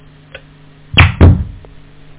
Switch-off POD2-Line6
Switch off sound Guitar Preamp Line 6 POD2